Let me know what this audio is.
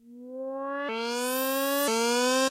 Preset Plunk-Extended C
Casio HZ-600 sample preset 80s synth
preset, sample